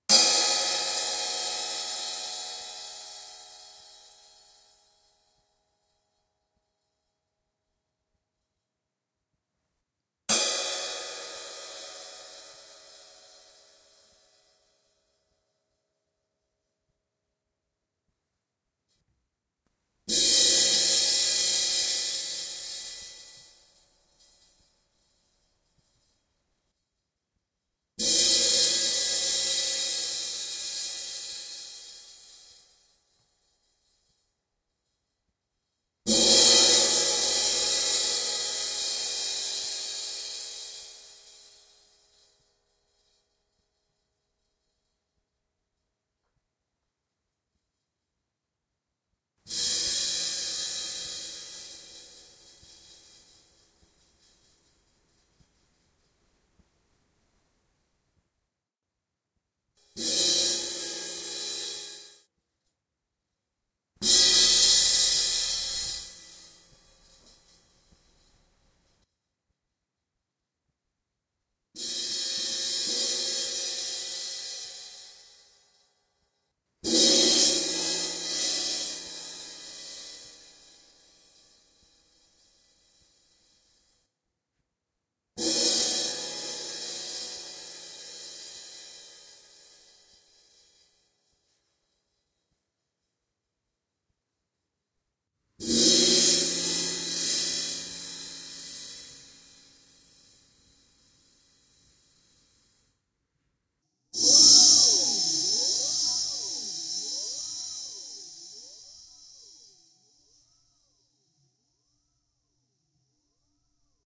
Ride cymbal with rivets
An 18 inch Masterwork Jazz Master crash ride played a couple of strikes with stick, then with hand to get just the sizzling sound. At the end are two samples with surreal effects.
sizzle, rivets, masterwork, jazz, cymbal, cymbals, ride, drums, crash, sizzling